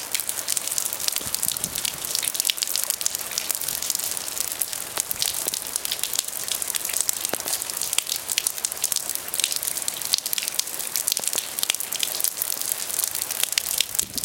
Rain Hitting Pavement
This was created by recording the sound of rain coming off of the corner of my house and hitting my pavement driveway. It was collecting in the corner of my roof, which is what made the sound louder and stronger than the sound of rain which was falling naturally.
raindrops, shower, pavement, wet, raining, downpour, rain